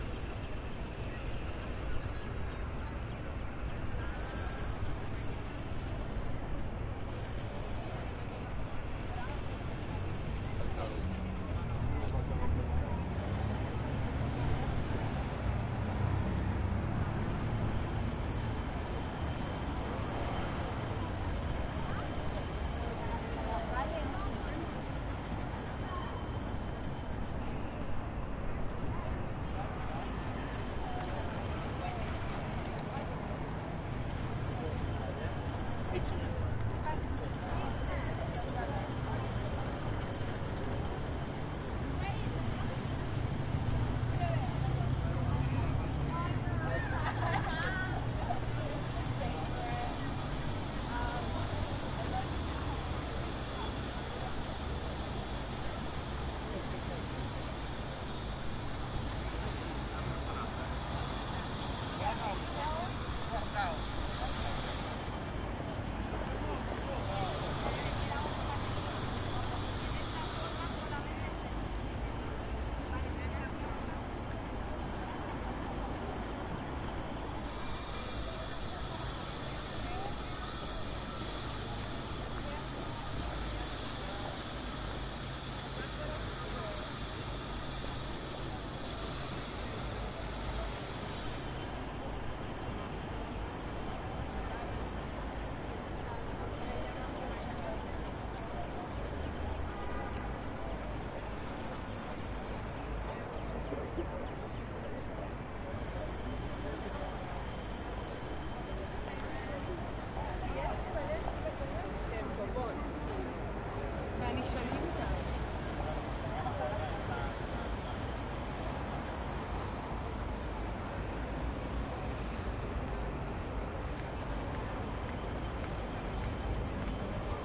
general street atmos - pedestrians walk past, traffic in the distance and pneumatic drill and stone cutter noise from road-works.